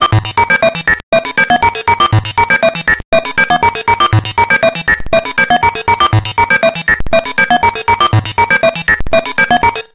techno 1 gated 120bpm
The developers gave no explanation to its users and continued to sell the non-working app and make other apps as well. These are the sounds I recorded before it was inoperable and the source patches seem to be lost forever.
beep, beeps, metalic, techno